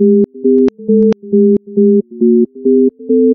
a creepy slowed down, reversed, echoing phone being dialed made by me with audacity